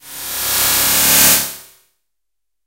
Electronic musquitos E5
This sample is part of the "K5005 multisample 18 Electronic mosquitoes"
sample pack. It is a multisample to import into your favorite sampler.
It is an experimental noisy sound of artificial mosquitoes. In the
sample pack there are 16 samples evenly spread across 5 octaves (C1
till C6). The note in the sample name (C, E or G#) does not indicate
the pitch of the sound. The sound was created with the K5005 ensemble
from the user library of Reaktor. After that normalizing and fades were applied within Cubase SX.
mosquitoes, multisample, noise, reaktor